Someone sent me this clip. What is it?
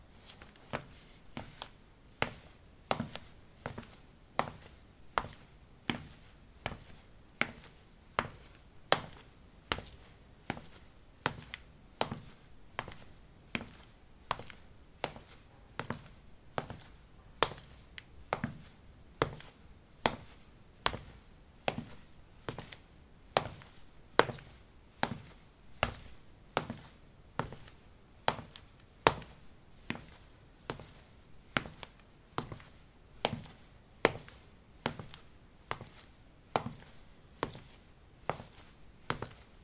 Walking on ceramic tiles: ballerinas. Recorded with a ZOOM H2 in a bathroom of a house, normalized with Audacity.